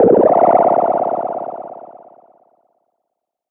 Blip Random: C2 note, random short blip sounds from Synplant. Sampled into Ableton as atonal as possible with a bit of effects, compression using PSP Compressor2 and PSP Warmer. Random seeds in Synplant, and very little other effects used. Crazy sounds is what I do.
110, acid, blip, bounce, bpm, club, dance, dark, effect, electro, electronic, glitch, glitch-hop, hardcore, house, lead, noise, porn-core, processed, random, rave, resonance, sci-fi, sound, synth, synthesizer, techno, trance